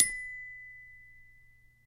Multisample hits from a toy xylophone recorded with an overhead B1 microphone and cleaned up in Wavosaur.